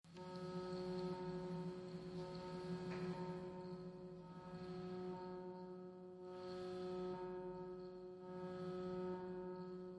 BARDELLI Mickael 2015 2016 synthesisferry
This sound is an recording of phone's vibration. I used the reverberation effect on it. This sound can be use as a ferry sound that come in a marina.
That's a general noise and the reverberation represent the area of the marina.